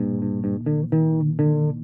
recording by me for sound example to my student.
certainly not the best sample, but for training, it is quiet good. If this one is not exactly what you want listen an other.